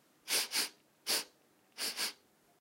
sniffing sound, sennheiser me66 > shure fp24>iRiver H120 / sonido de esnifada, olisqueo
cocaine, drugs, mammal, rat, scent, sniff